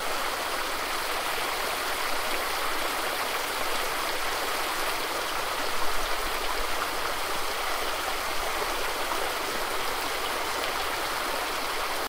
Cascading Water #3
Water Feature at Uni
water, field-recording, fountain